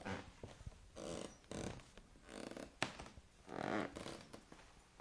Creaking wooden object
crackle, creak, squeak, wood